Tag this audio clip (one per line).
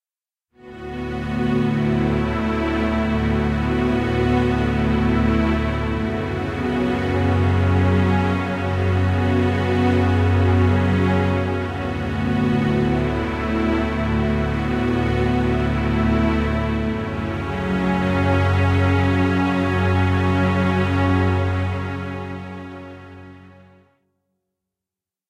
music
ambience
suspense
spooky
atmosphere
background
mood
strings
drama
dramatic
dark
thriller
soundscape
cinematic
pad
ambient
scary
film
deep
thrill
movie
horror
story
drone
background-sound
trailer
hollywood